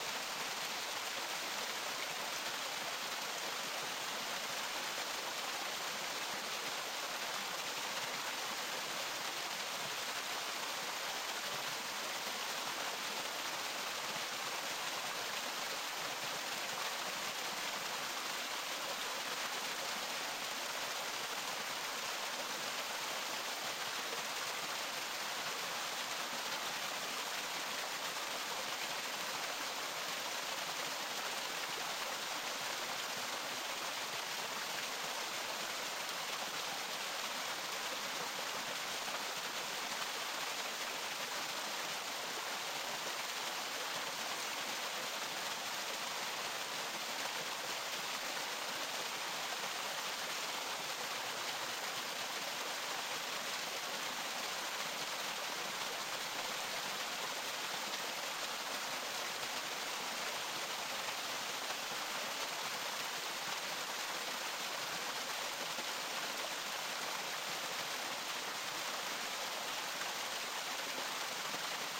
Recording of water flowing in a small creek. It can be looped.
water, wild, music, filed-recording, nature, ambience, soundscape